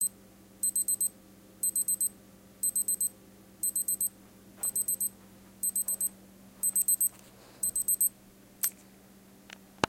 Digital Watch Alarm
Recorded with a black digital IC Sony voice recorder.